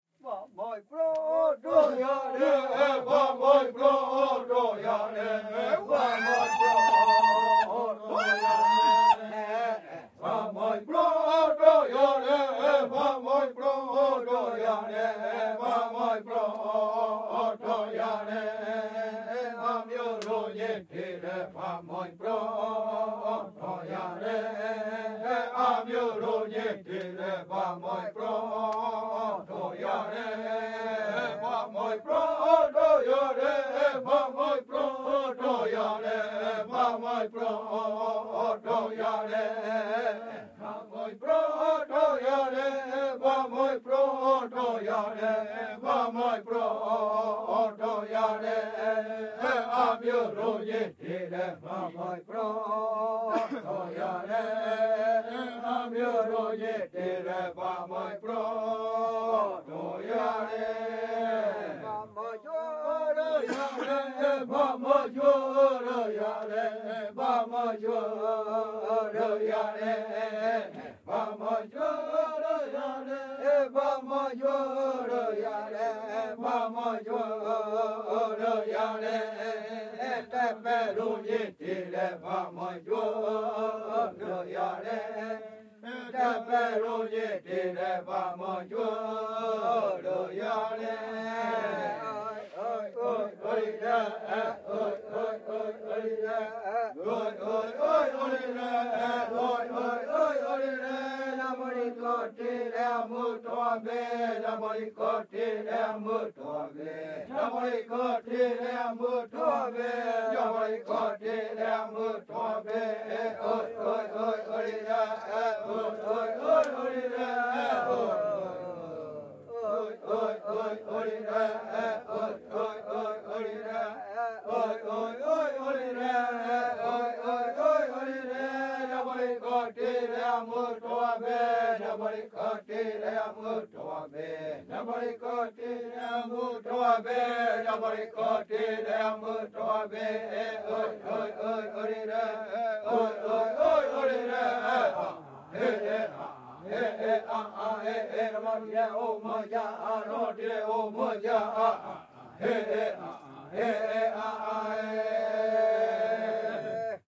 Song number 8 from the "Kayapo Chants". Group of male Kayapó native brazilian indians singing "the warrior chant", in "Las Casas" tribe, in the Brazilian Amazon. Recorded with Sound Devices 788, two Sennheiser MKH416 in "AB" and one Sennheiser MKH60 in center. Mixed in stereo.